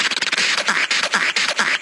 rhythmic voice, with massive amounts of compression, gating, equalizing etc